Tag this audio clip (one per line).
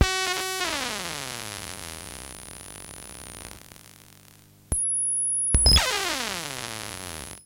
atari
lofi
noise